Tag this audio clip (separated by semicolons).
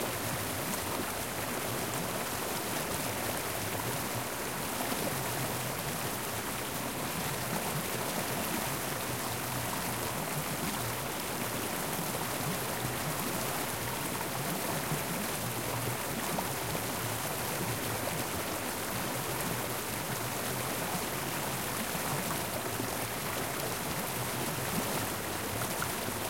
nature; Field; H5; waterfall; water; stream; Recording; Zoom; fall